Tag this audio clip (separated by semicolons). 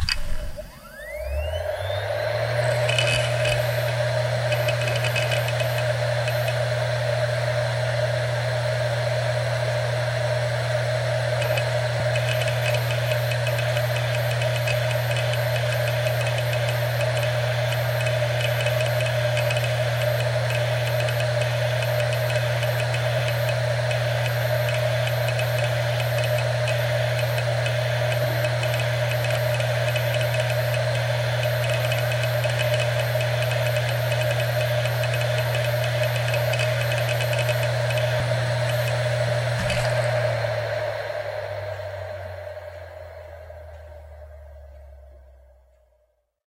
drive; hdd; hitachi